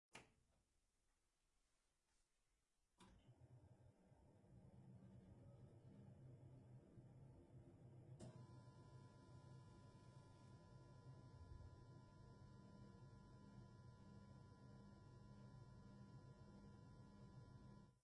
1.13 gas-heather
Audio of gas heather that someone might have at home.
flatulence, gas, heather